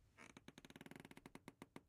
Wood Creak 8
Wooden Creaking
Wooden Chair Creak